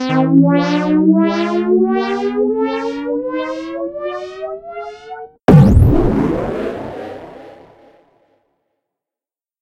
ship going into warp/ftl/hyperdrive created using tones generated and modified in Audacity
alien, engine, fiction, future, space-ship, ufo, futuristic, spaceship, hyperdrive, scifi, drive, warp, sci, science-fiction, fi, space, science, startrek, aliens, ship, starship, ftl, star, wars, sci-fi, starwars